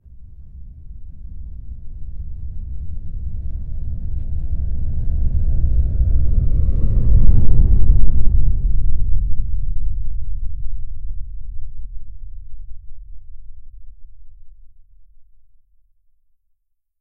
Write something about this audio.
Large Low Rumble Passing
This is another low, rumbling noise of a large object moving by or passing by something or someone.
space, spaceship, moving, landing, low, passing, ship